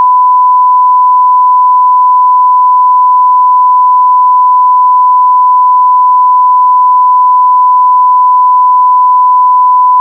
Beep sound of 1000 hz
10 seconds of a 1000hz beep sound, helpful for making censor beep sounds or tone signals for mastering.